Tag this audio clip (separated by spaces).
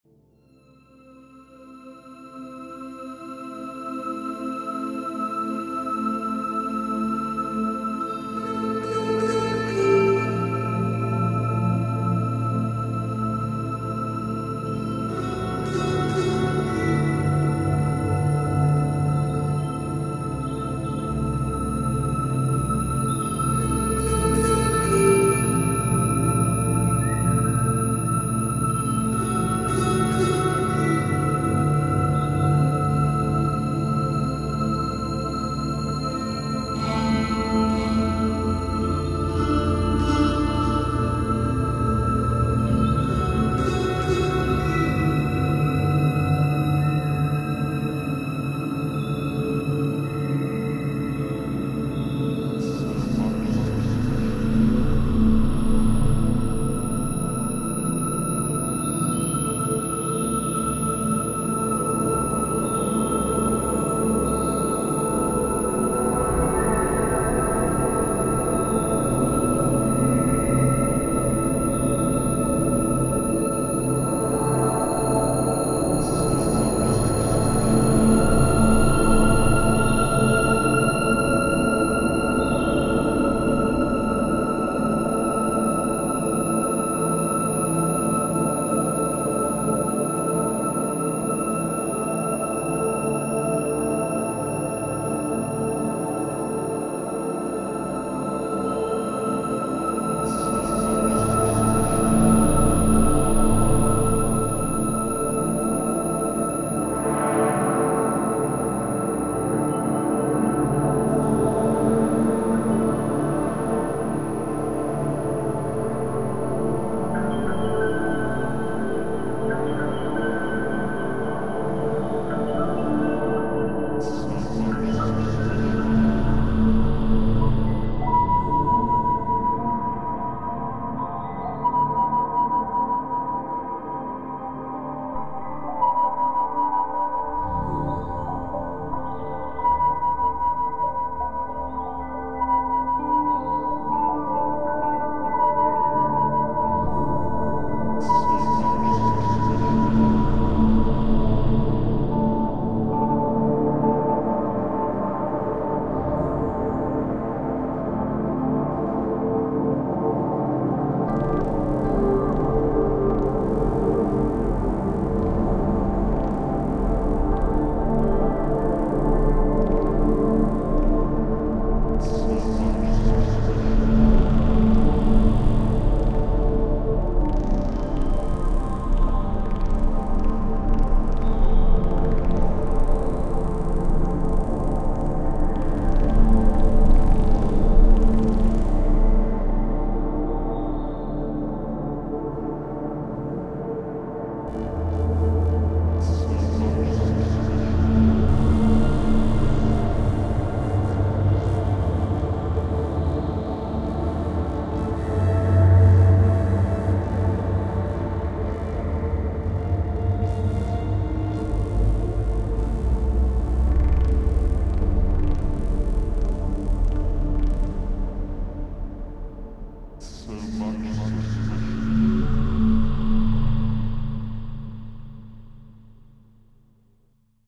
ambience cinematic dark eerie sample